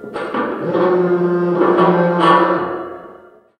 The CD stand is approximately 5'6" / 167cm tall and made of angled sheet metal with horizontal slots all the way up for holding the discs. As such it has an amazing resonance which we have frequently employed as an impromptu reverb. The source was captured with a contact mic (made from an old Audio Technica wireless headset) through the NPNG preamp and into Pro Tools via Frontier Design Group converters. Final edits were performed in Cool Edit Pro. The objects used included hands, a mobile 'phone vibrating alert, a ping-pong ball, a pocket knife, plastic cups and others. These sounds are psychedelic, bizarre, unearthly tones with a certain dreamlike quality. Are they roaring monsters or an old ship breaking up as it sinks? Industrial impacts or a grand piano in agony? You decide! Maybe use them as the strangest impulse-responses ever.